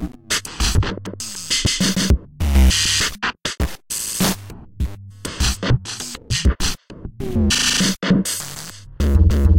One in a series of 4-bar 100 BPM glitchy drum loops. Created with some old drum machine sounds and some Audio Damage effects.
100-bpm, 4-bar, bass, beat, digital, drum, glitch, loop, snare, sound-design